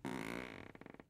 Wood Creak 12
Wooden Creaking
Wooden Chair Creak
Chair, Creak, Creaking, floor, Wooden